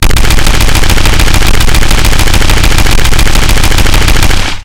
rifle burst 4 secs
Riffle shots overlapped from the awesome sound effect from Keatonmcq.
fire, gun